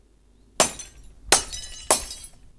Breaking3Glasses
breaking 3 glasses